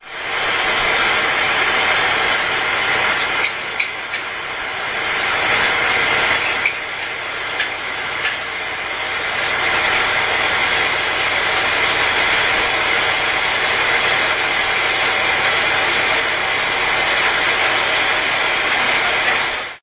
19.11.2010: about 4 p.m. my family home in Sobieszow (Low Silesia). my mother kitchen and ventilation hood noise mixed with pan-frying sound.